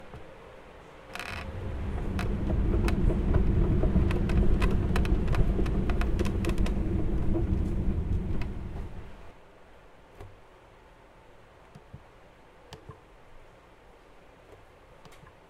Cart RBR 4
A wooden book cart rolls on a wooden library room floor.
field-recording, library, roll, wood